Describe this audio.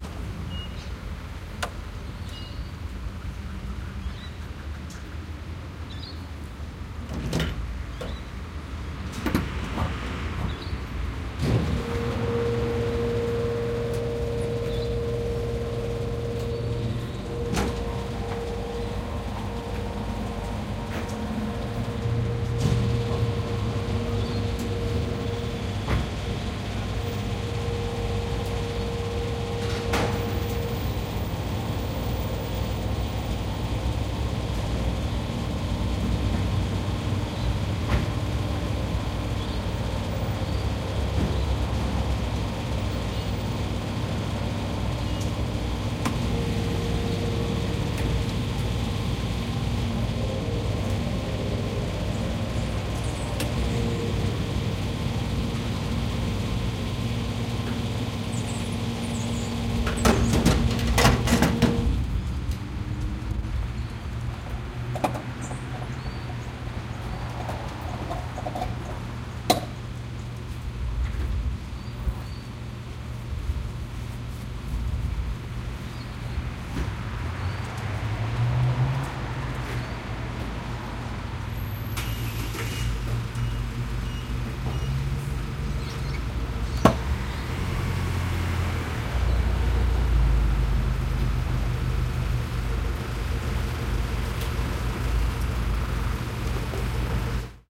Filling Car
Not a great recording. But is some ambience from a service station while filling a car. The microphones were rest on the roof of the car separated by about 15 cm. Recording chain: Panasonic WM61A (microphones) - Edirol R09HR (digital recorder).
automotive, car, car-care, diesel, filling, fuel, gas-station, petrol, petrol-station, pump, service-station